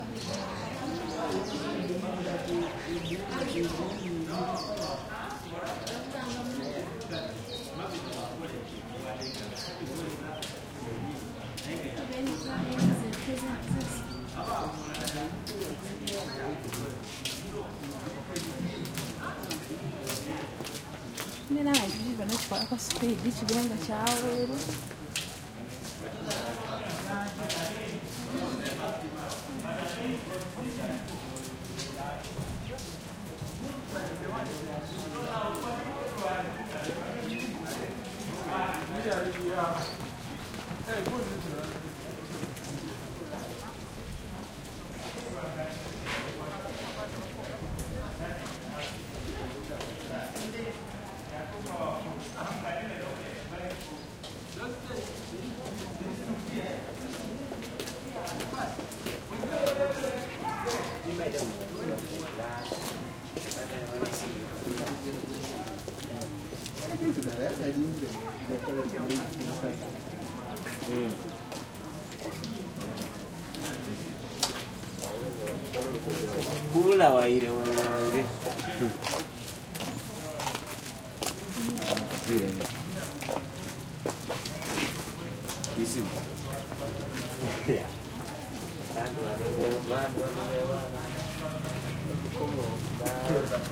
crowd ext light students on covered concrete path of university campus could be courtyard boomy voices echo scratchy steps kinda too close for ambience Mbale, Uganda, Africa 2016
Africa, campus, courtyard, crowd, ext, light, students, Uganda